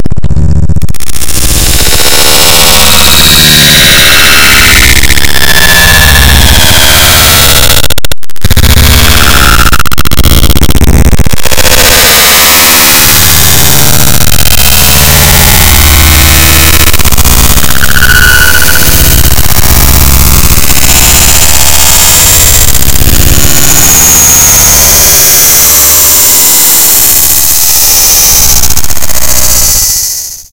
A electric shok. Made with audacity.
7-8 effects.